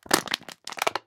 found plastic bottle 2
Crumbling a plastic bottle
plastic, bottle, break, foundsound, crumble, garbage